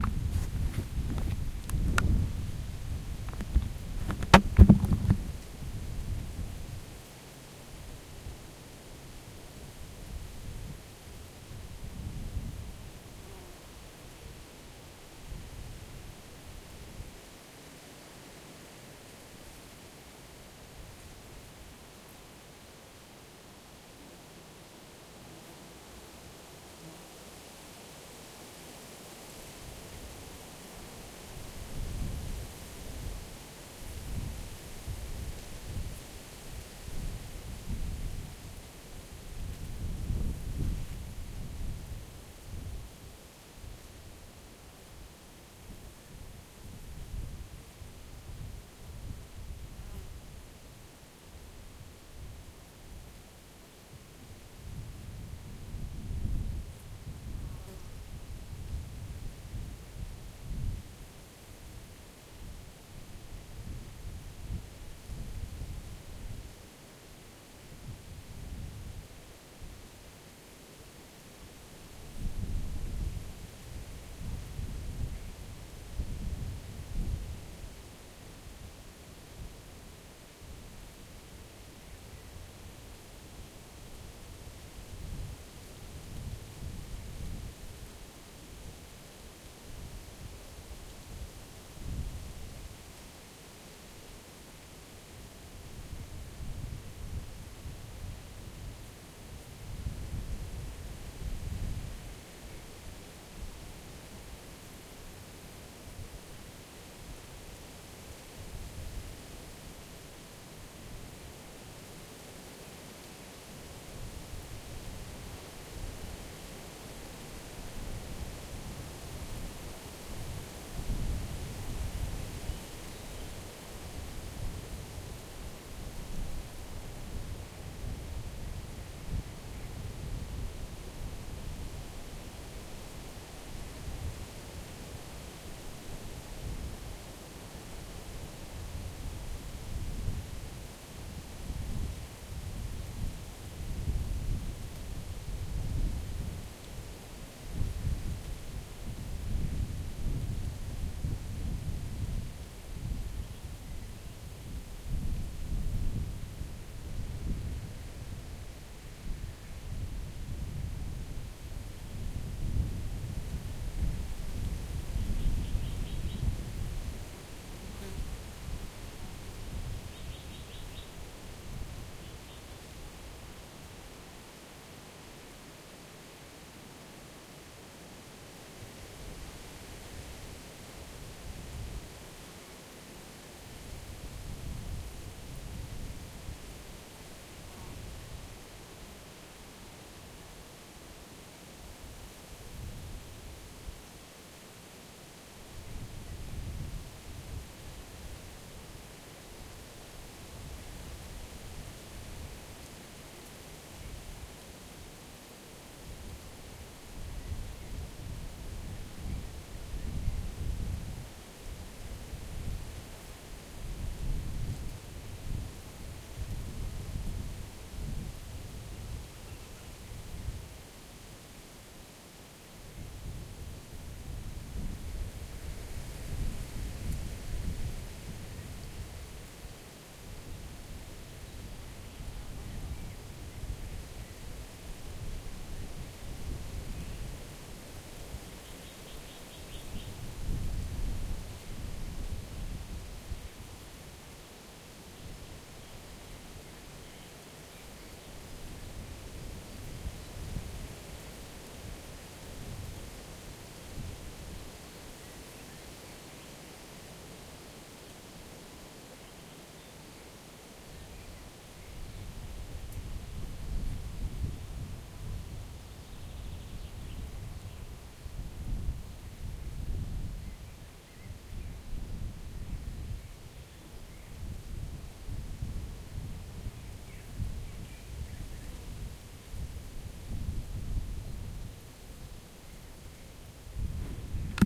windy spring in the woods - rear
windy spring in the woods